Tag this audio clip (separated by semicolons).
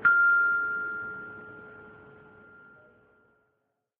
hit; metal; percussion; one-shot